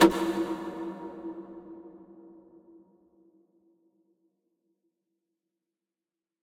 Light Switch of doom
A large light source being switched on in a strange dig site
chamber, large, light, switch